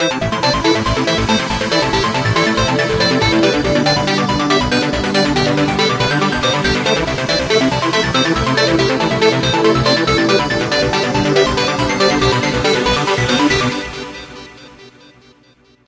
This is a smaller mix i have been working on. There are four parts workin together which are pad,melody 1, melody 2, and the bassline but it still needs much more work to have a completed feel. Maybe you can finish it better than i could